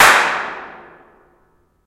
Basement Impulse-Response reverb 6m away medium-pitched clap